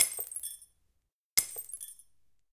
A small glass being dropped, breaking on impact.
Recorded with:
Zoom H4n op 120° XY Stereo setup
Octava MK-012 ORTF Stereo setup
The recordings are in this order.